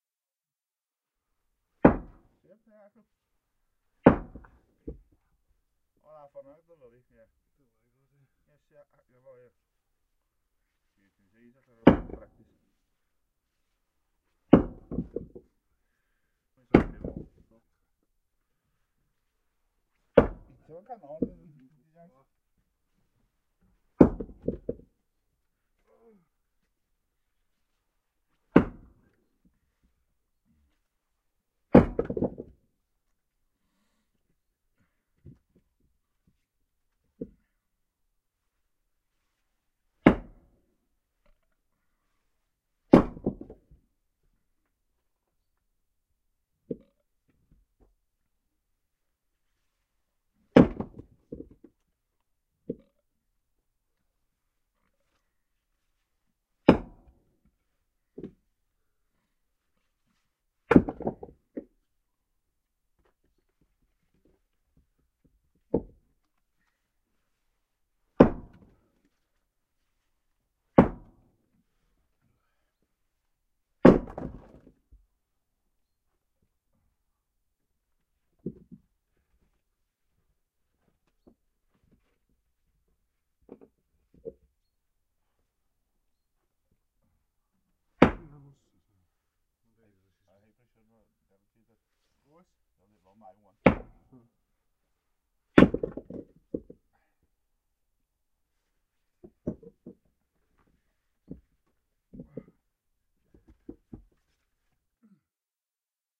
Splitting Logs
A stereo field-recording of two men splitting dry hardwood logs. One places the logs on the plinth while the other splits them.. Rode NT-4 > FEL battery pre-amp > Zoom H2 line in.